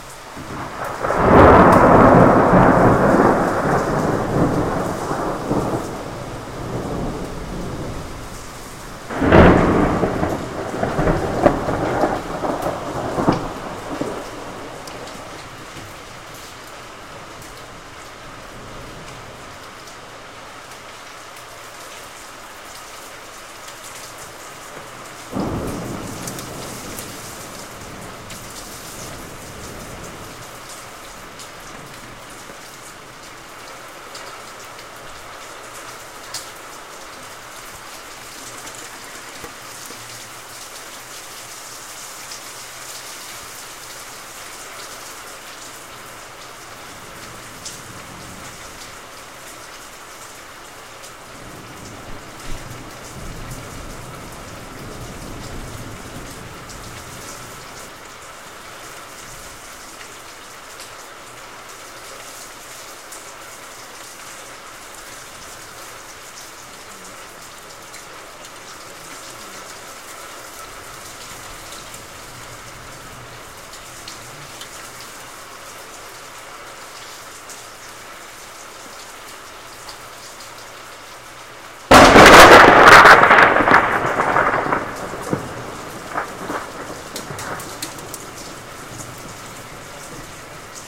Heavy rain w/ thunders
Recorded next to my window with a CAD u37
(São Paulo - Brazil)
rain, thunder, nature, storm